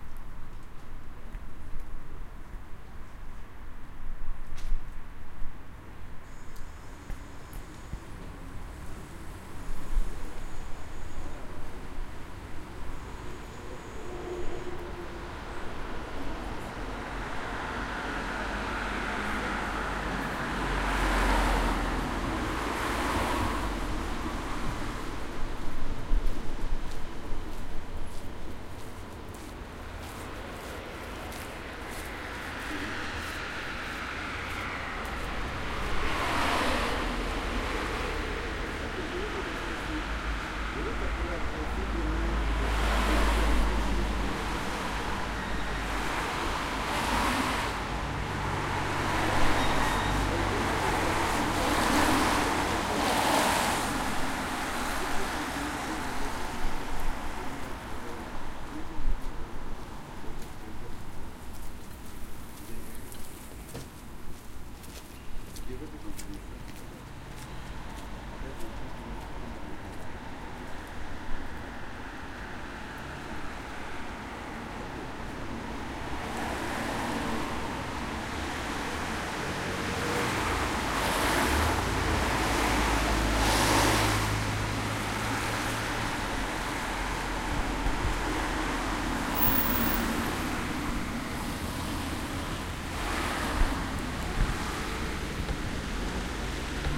ambiance traffic people on the street 001
street sounds: traffic/cars people walking by and talkinng
ambiance, ambience, ambient, atmo, atmos, atmosphere, atmospheric, background, background-sound, cars, city, field-recording, general-noise, noise, people, soundscape, steps, street, talking, traffic, voice, walking